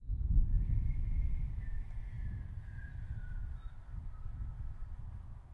SFX for the game "In search of the fallen star". Plays when the player walks on clouds.